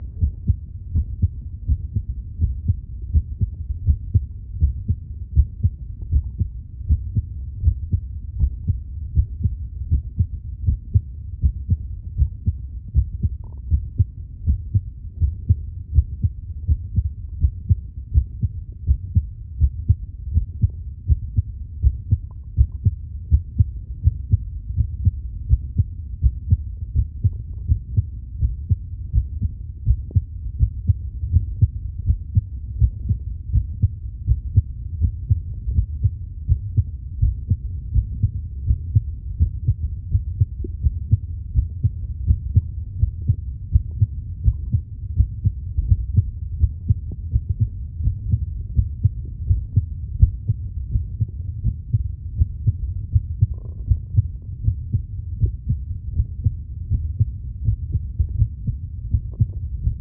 One min of human heartbeat. Just playing around with a stethoscope connected to a couple of EM-172 microphones. Still a little work to do to get the sound that I am looking for but for now here is 60 seconds of life.
beat, Beating, Blood, cardiac, Heart, heart-beat, pulse, pumping, rhythm